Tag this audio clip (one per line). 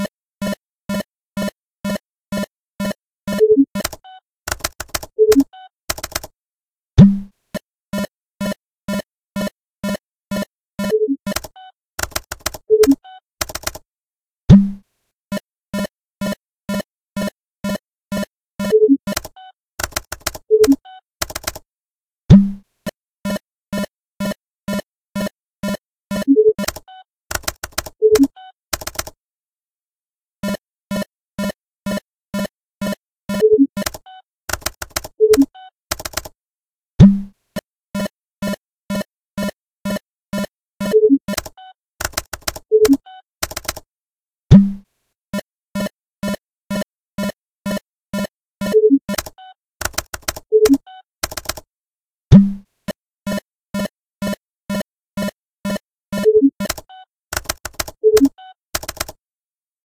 buttons
clicker
pitch